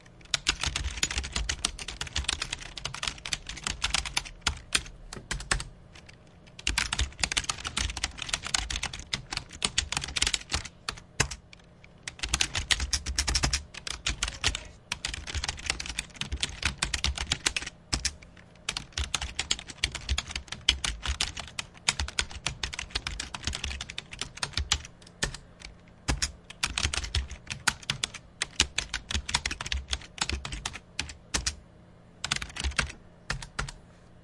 Typing sound effect.
Hi friends, you can use this sound effect any way you like.
THANKS!
COMPUTER, computer-keyboard, keyboard, keyboard-sound, keystroke, type, Typing, typing-sound